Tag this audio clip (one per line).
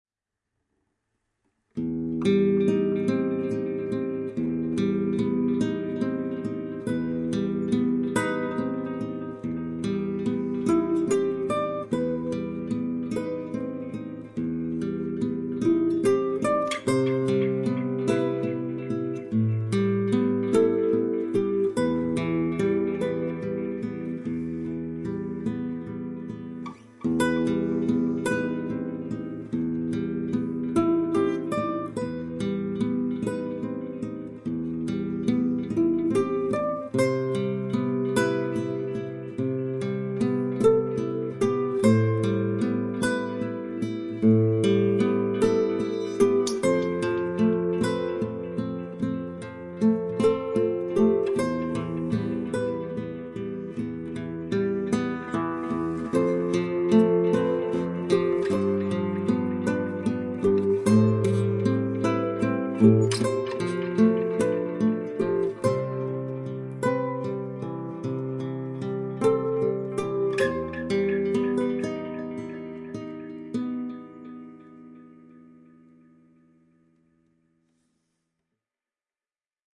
soundtrack
acoustic
ambience
ambient
delay
film
guitar